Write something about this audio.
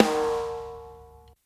Snare sample - Punchy